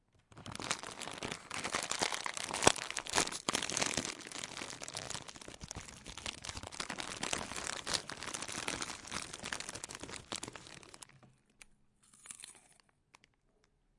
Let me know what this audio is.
Recorded with: Zoom H6 (MSH-6 Capsule)
Opening a bag of mini rice crackers and then biting one.
rice-bread, plastic, munch, opening-bag, crunch, bite, food